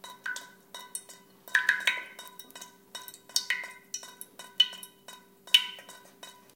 20110924 dripping.mono.07.loop
dripping sound. Sennheiser MKH60, Shure FP24 preamp, PCM M10 recorder
dripping, faucet, rain, tap, water